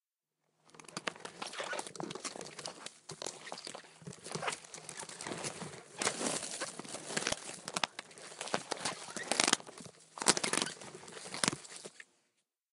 crunching scraping 2
Combination of crunching and scraping sounds. In my opinion, the better of the two crunching-scraping recordings.
anxious, bogey, creepy, crunch, crunching, crunching-scraping, crunchy, drama, fear, ghost, haunted, horror, monster, nightmare, scary, scrape, scraping, sinister, spooky, suspense, terror, thrill, thriller